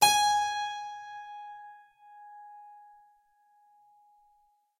Harpsichord recorded with overhead mics
Harpsichord, instrument, stereo